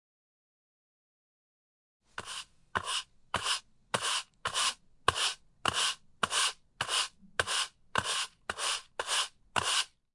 01-1 Sweeping, fast
Fast sweeping on concrete pavement during daytime, exterior. Recorded on Edirol-44 and Rode NTG-2. Postproduction software: Adobe Audition.
Panska, sweeping, pavement, Czech, concrete, garden, gardening, exterior, concrete-pavement, field-recording, CZ, broom, day, fast